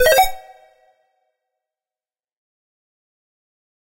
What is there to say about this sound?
Menu Interface - Confirm 003
This was made to emulate the classic sounds of SNES era video games.